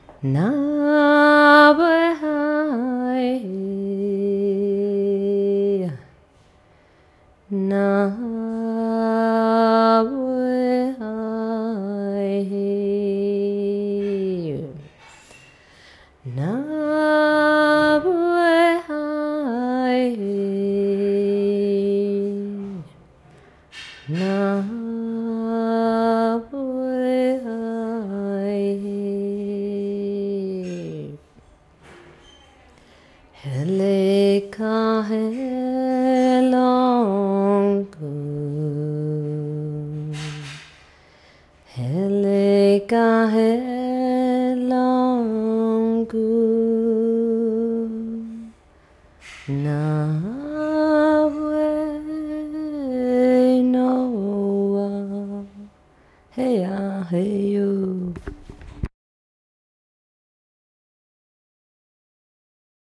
Girl singing mantra song next to the kitchen
girl, H1, song, Zoom, voice, mantra, human